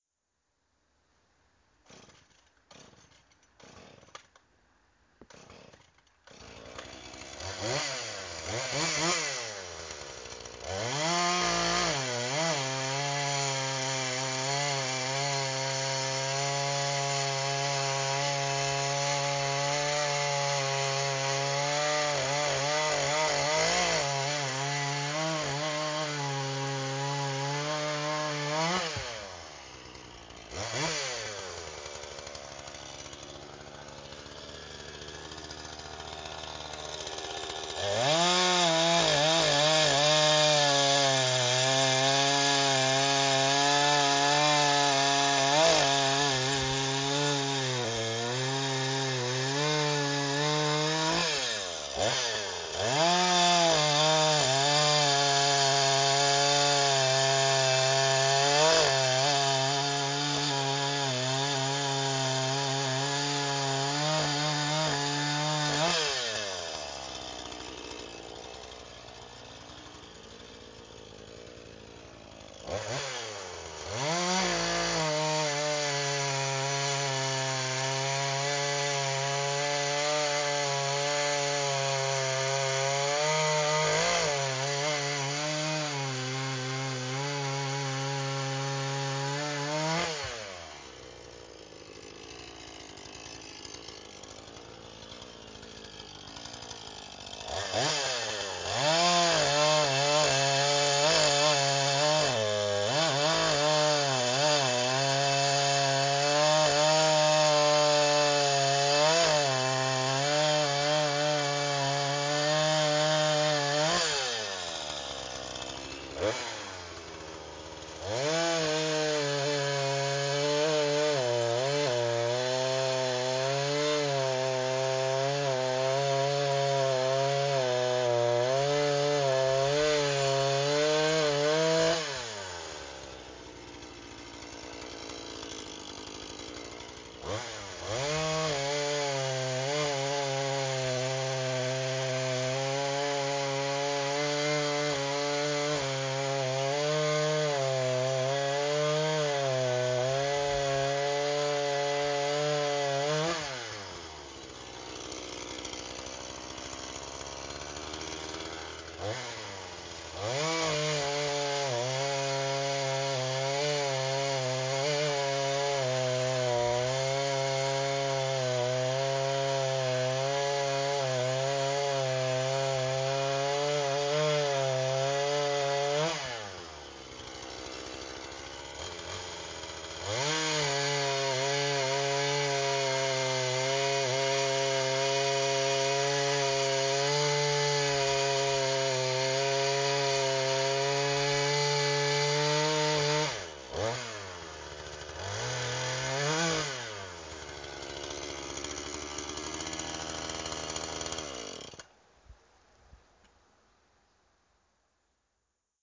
Log cutting.
Cutting the log with a chainsaw.
Recorded 5-30-2015 Salo, Finland
Recording device: Sony Xperia z1 compact. (Easy voice recorder)
Editing software: Audacity
Operating system: Xubuntu 15.04
saw, sawing, chainsaw, cutting